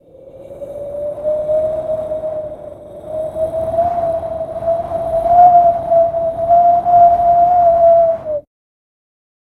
Wind Arctic Storm Breeze-021
Winter is coming and so i created some cold winterbreeze sounds. It's getting cold in here!
Arctic, Breeze, Cold, Storm, Wind, Windy